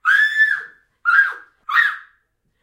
Short Burst Screams (Girl)
Recorded with the on-board X-Y mic of a Zoom H4n Pro on June 13, 2021 in the carpeted stairwell of an NYC apartment building